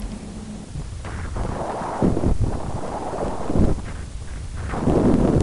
sample exwe 0027 tr
generated by char-rnn (original karpathy), random samples during all training phases for datasets drinksonus, exwe, arglaaa
char-rnn, generative, network, neural, recurrent